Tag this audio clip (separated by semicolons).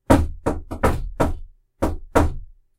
bang; slam; two